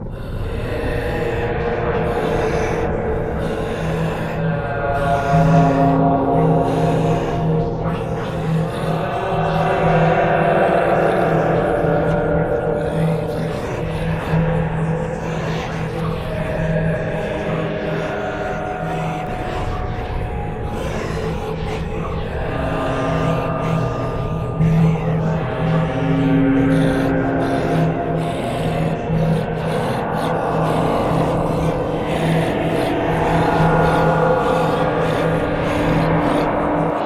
a sample i made in Cubase. a man saying get me out heavily reverberated. w/ added feedback that i pitch shifted and reverberated. and lots of whispering voices in different pitches.